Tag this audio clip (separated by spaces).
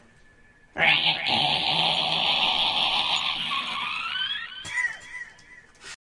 screech weird